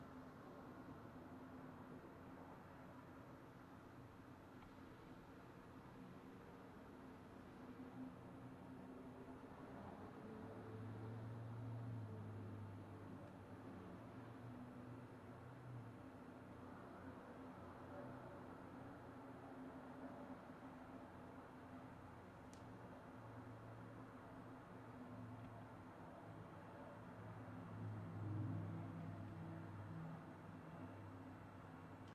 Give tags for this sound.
apartment traffic room-tone ambience

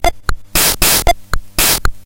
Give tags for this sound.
retro rock 80s pt1 casio loop drumloop

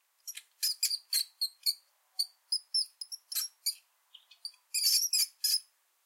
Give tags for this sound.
Mouse squeak

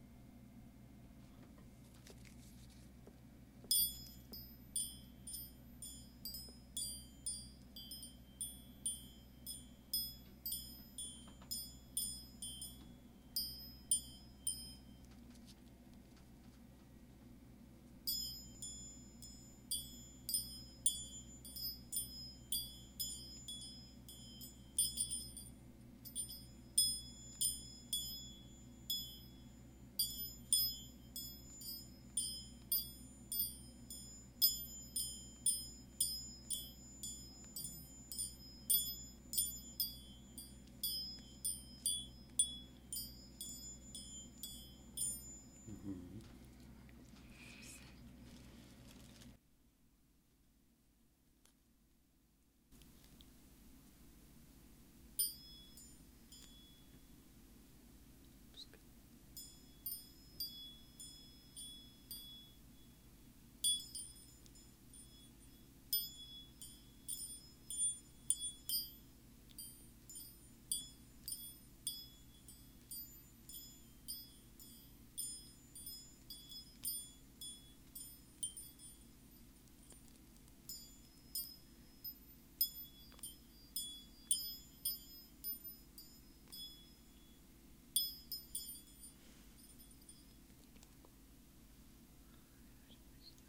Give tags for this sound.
clink metal

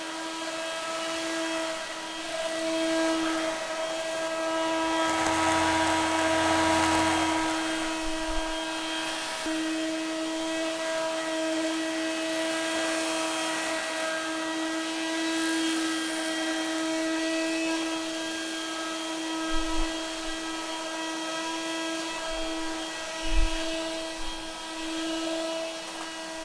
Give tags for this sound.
buzzing Vacuum cleaning

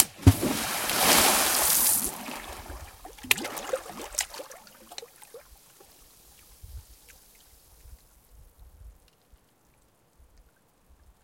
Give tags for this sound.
water splashing percussion bloop splash

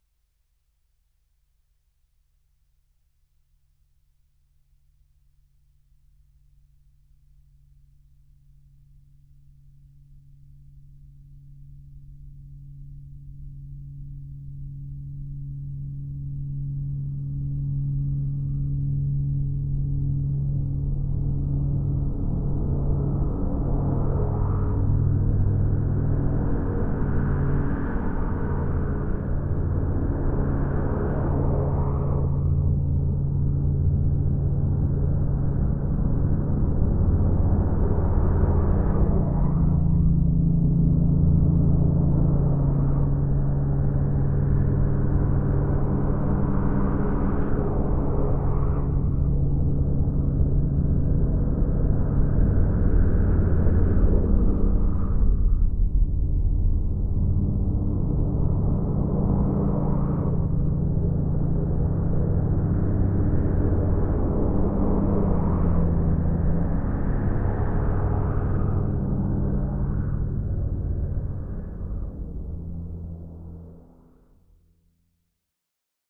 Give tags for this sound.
phasing drone

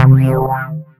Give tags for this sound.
Synth synthetic Sound

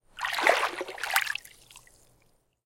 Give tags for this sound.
swirl splash water flow small swirling swooshing pool

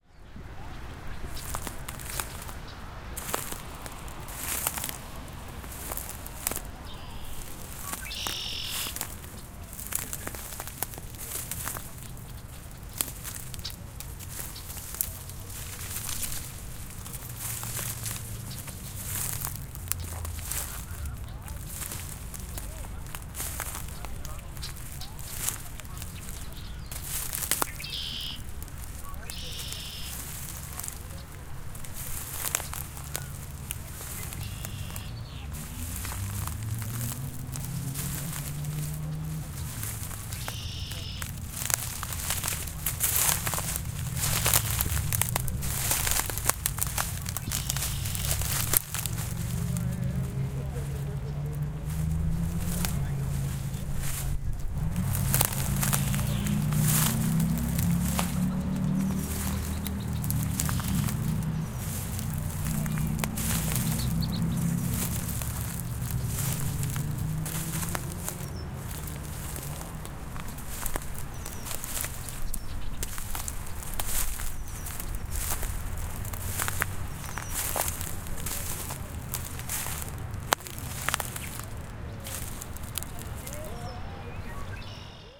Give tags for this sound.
ambience ambient birds canada city crackling crunch din dry-plants field-recording footsteps jarry-park montreal park quebec step walking

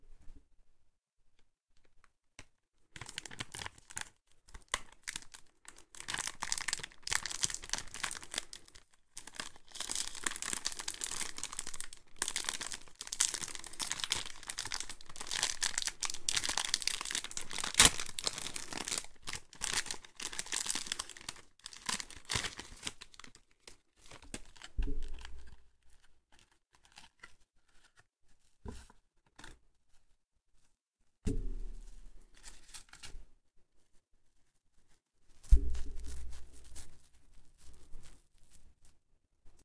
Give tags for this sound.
plastic sound chocolates wrapper candy asmr effect

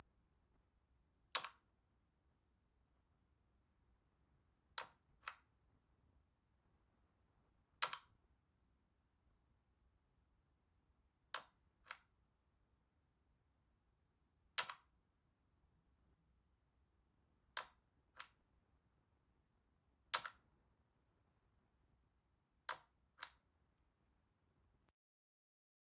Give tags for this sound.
pen pencil signature

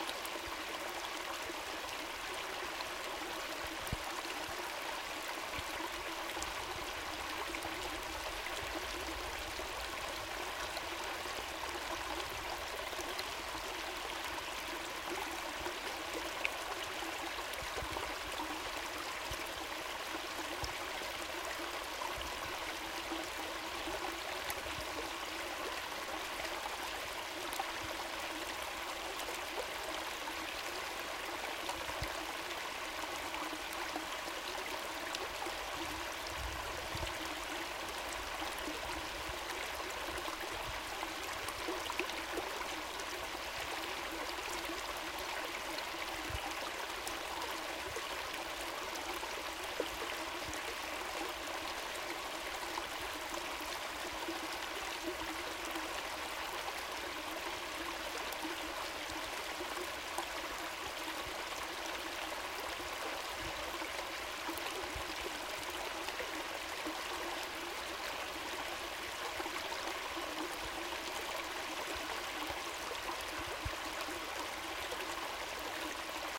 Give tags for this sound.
babble brook field-recording nature splash stream water

